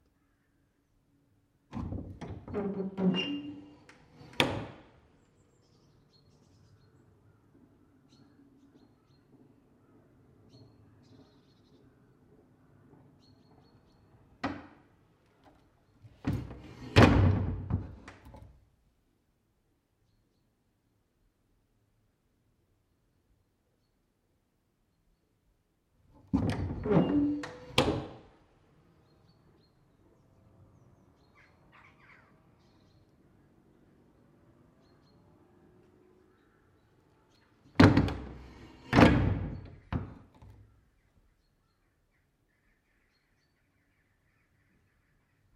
Old sliding metal window lock in the Noirlac Abbey, Bruere Allichamps, France. Lots of natural reverb, delay and acoustics.
Echo, Thalamus-Lab, Noirlac-Abbey, Open-Window, Acoustics, Reverb